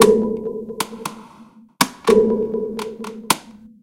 click and ping loop
I changed the speed slightly, and pulled together the rhythms so that I could copy-paste or repeat it in Audacity without having to trim out hiccups. It's 4/4 time, just a little faster than 60 bpm.
beat, processed, loop